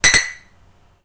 Beer Bottle Clinks-03
bottle, beer, klang, hit, clink, clang, jangle